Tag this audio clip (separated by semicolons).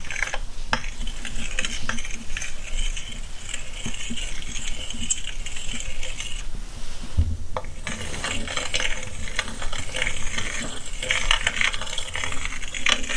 scrape; sample; Foley